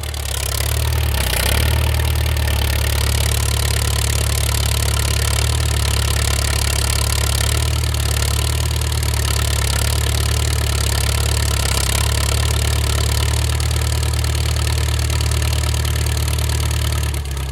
JCB Moving
One of the many sounds from my Farmyard and factory machinery pack. The name should speak for itself.
medium, Buzz, electric, machine, low, Rev, Factory, engine, motor, high, Mechanical, Industrial, Machinery